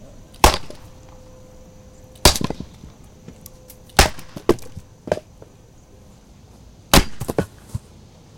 Chopping wood
ax chopping fire wood
ax, chopping, wood